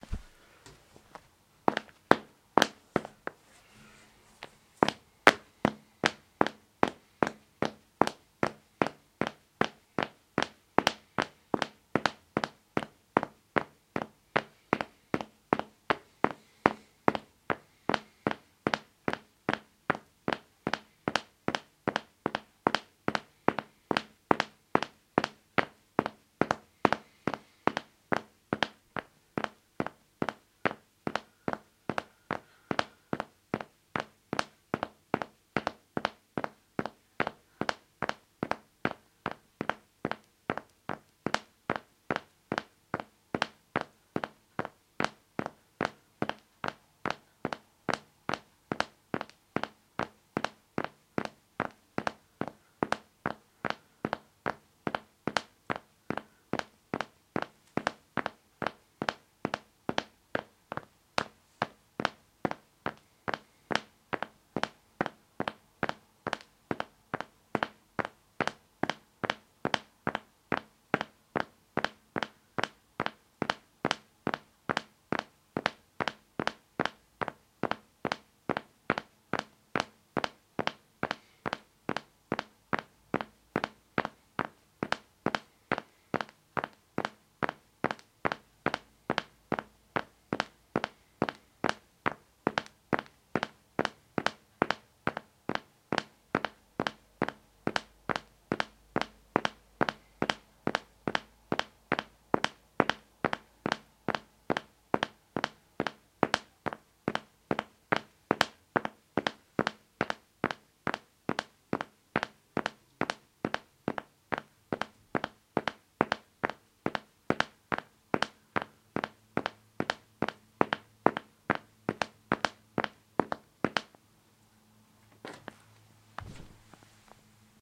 Hard-soled shoes on a tile floor with a fast pace. Recorded using a Shure SM58 microphone.